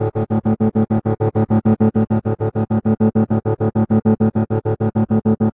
Violin loop2
a violin loop